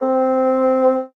fagott classical wind
wind, classical, fagott